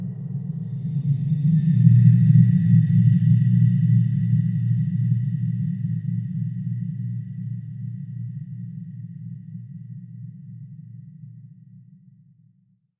Low darkscape. Really low frequencies. This sample was created using the Reaktor ensemble Metaphysical Function from Native Instruments. It was further edited (fades, transposed, pitch bended, ...) within Cubase SX and processed using two reverb VST effects: a convolution reverb (the freeware SIR) with impulses from Spirit Canyon Audio and a conventional digital reverb from my TC Electronic Powercore Firewire (ClassicVerb). At last the sample was normalised.
long-reverb-tail, deep-space, ambient, drone